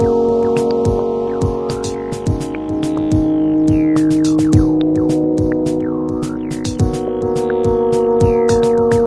dreamy electronic music clean loop
short soft perfectly looping piece of 100-ish bpm music i made for fun in ableton. inspired a bit by darwinia's soundtrack. i would love to see it :)
100-bpm, ambient, atmosphere, electronic, game-music, loop, mellow, music, newage, rhodes, soundtrack